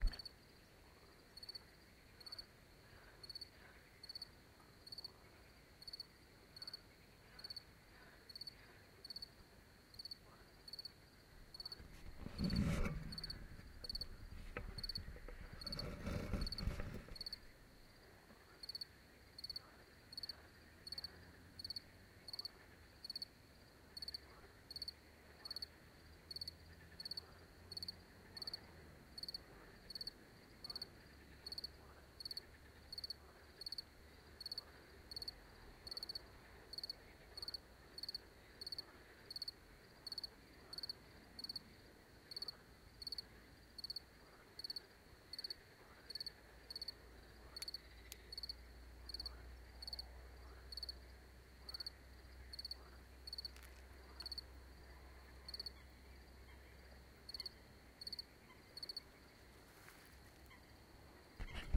The sound of a cricket chirping on a warm night in France.